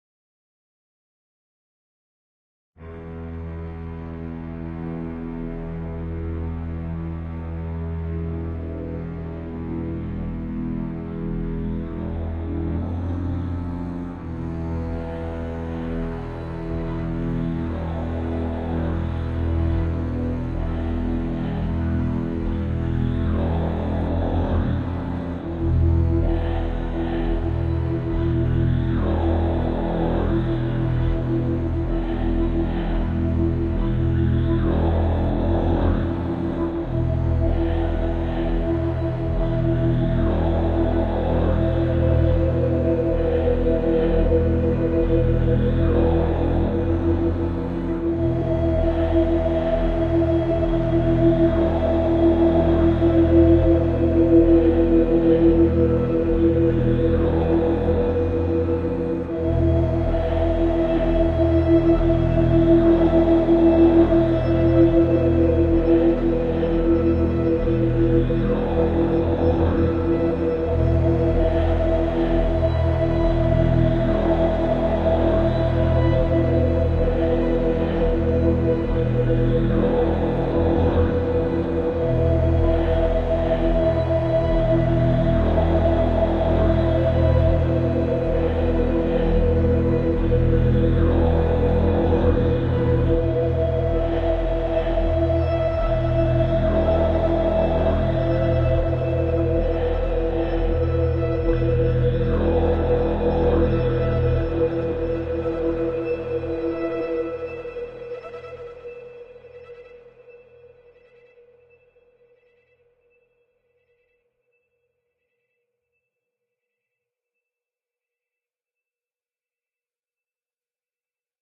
A eerie, creepy and or sinister piece of music that I composed that is guaranteed to give you the heebie jeebies!
composition, creepy, eerie, eerie-music, film-score, haunted, horror, horror-film, music, phantom, scary, sinister, spooky, suspense, suspenseful